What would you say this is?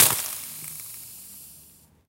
Dropping a few cold waterdrops on a hot plate simultaneously. Creating a nice impact and a nice tail. Also interesting as convolution reverb sample. The preview is not 100% accurate, the actual file you download is much more crisp.